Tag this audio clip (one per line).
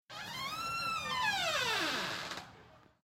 Door
Household
Creak
Squeaking
Open
Wooden
Squeak